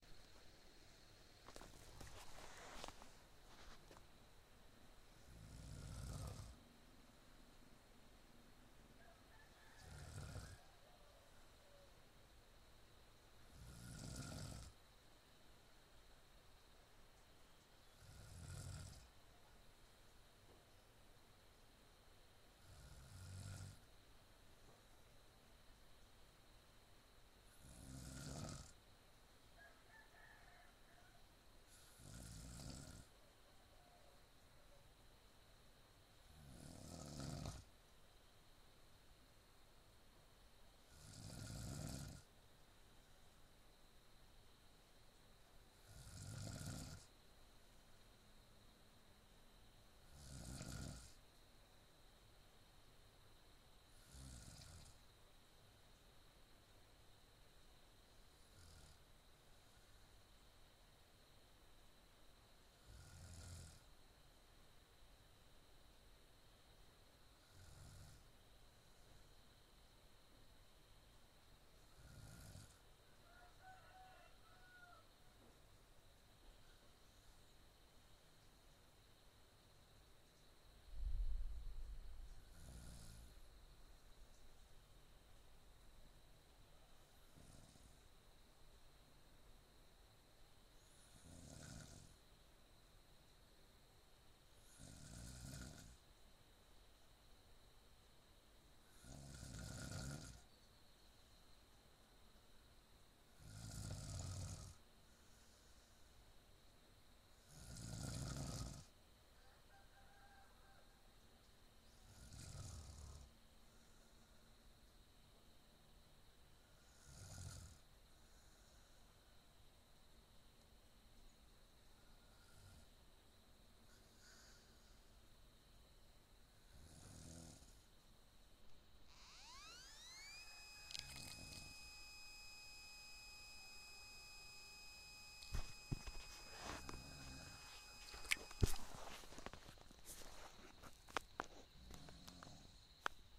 Snoringloreli,VienViang, Laos
snoring in Vien Viang, Laos.
laos, snoring, vien